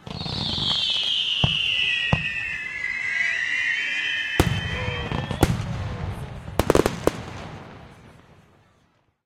fireworks impact7
Various explosion sounds recorded during a bastille day pyrotechnic show in Britanny. Blasts, sparkles and crowd reactions. Recorded with an h2n in M/S stereo mode.
blasts, display-pyrotechnics, pyrotechnics, show, explosives, bombs, explosions, fireworks, field-recording, crowd